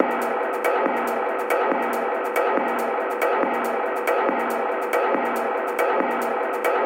Space Tunnel 4
beat, dance, electronica, loop, processed